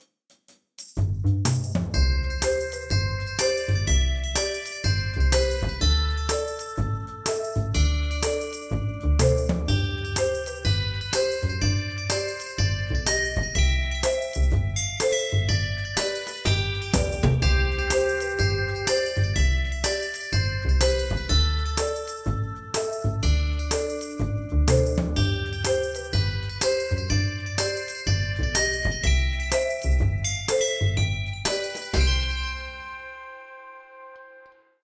A tropical cruise through the Bahamas. Give a hand for Betty & the band, playing every evening 5 - 8 in the sunset bar.
Although, I'm always interested in hearing new projects using this sample!
music, pacific, wave, double-bass, marimba, smooth, drums, chill, background, island, sound, guitar, mellow, loop, tropical, beach